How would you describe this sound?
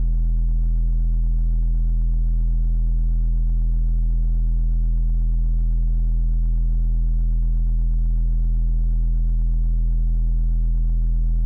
Doepfer A-110-1 VCO Sine - E1
Sample of the Doepfer A-110-1 sine output.
Captured using a RME Babyface and Cubase.